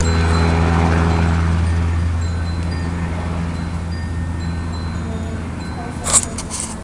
Helicopter and wind chime make sweet audio love.